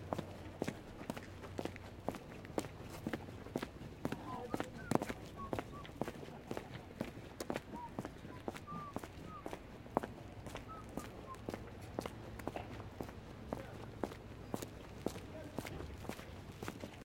Footsteps on concrete, early morning ambiance, men, low whistling 2
ambiance, man, walking